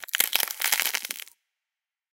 BRUNIER Lucas 2016 2017 chips
I recorded the sound, i cut the sound on fruity loops and used automation enveloppe for make a fade out. After, i put compressor and equalizer on it.
C’est un groupe nodal impulsif (plusieurs sons complexes) avec un timbre harmonique éclatant et croustillant. Son grain est rugueux, sa microstructure est très granuleuse.
Concernant, la dynamique elle a une attaque plus forte que le reste, mais a une sorte de rappel, comme un echo qui répond mais moins fort.
Le son est stéréo. Il a été compressé et un équaliseur a été utilisé pour amplifié les hautes fréquences afin de pouvoir mieux ressentir le timbre cassant et croustillant.